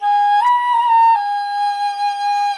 flute-sample
A few notes from a flute; rich harmonics